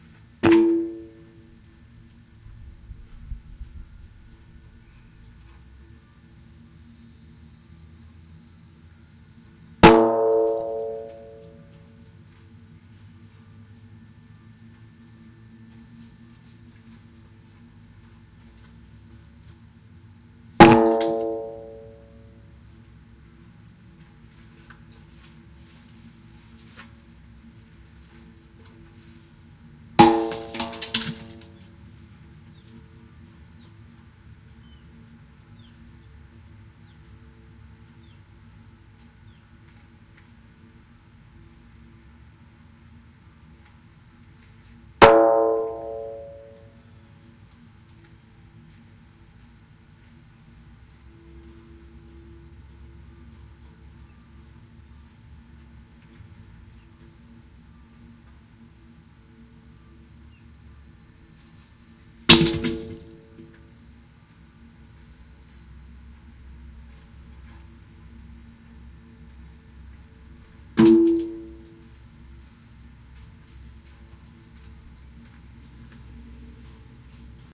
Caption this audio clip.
tire percussion
Some files were normalized and some have bass frequencies rolled off due to abnormal wind noise.
field-recording, percussion, stereo, tire